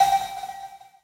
Rattling cowbell with reverb.